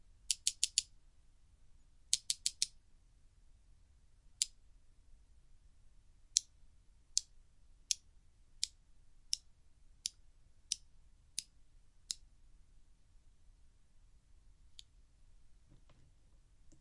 Hitting chopsticks together at different time intervals.